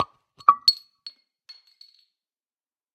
Blacksmith open a bottle of beer and the bottlecap flies to the floor.